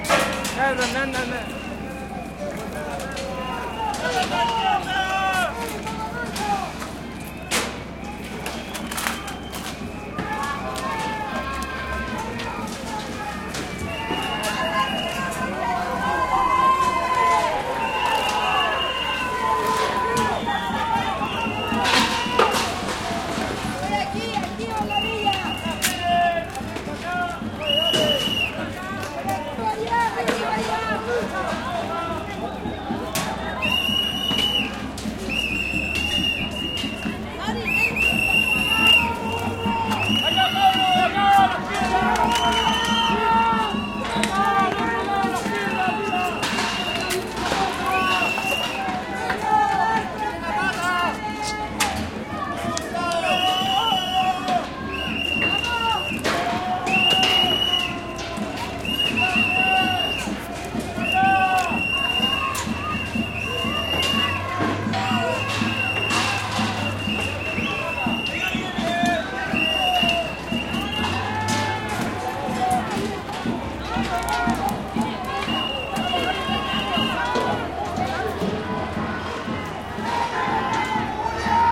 Protests in Chile 2019 Group of protesters throwing stones at the police